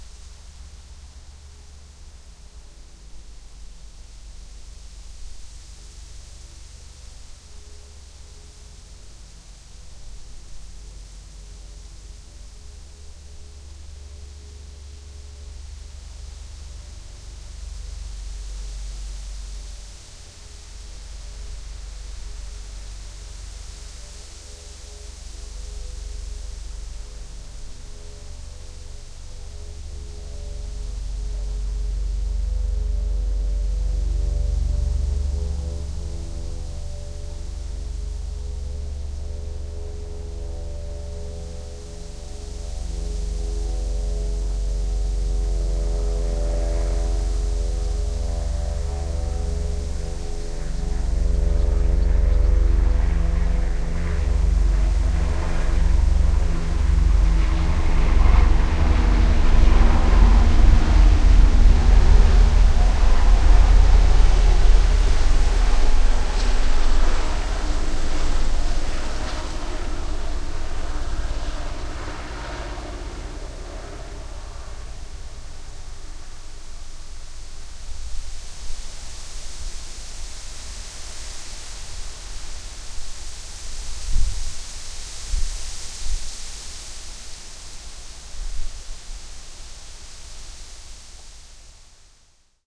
The sound of two Eurocopter EC 155 B1 helicopters, flying by very close to each other, just a few hundred meters away, from where my recorder was placed. The Doppler effect is quite easy to hear in this recording. You can also hear the wind blowing in the trees.
Recorded with a TSM PR1 portable digital recorder, with external stereo microphones. Edited in Audacity 1.3.5-beta on ubuntu 8.04.2 linux.
ec, trees, wind, helicopter, backgroundnoise, flying, fly-over, eurocopter, 155, air, windy, b1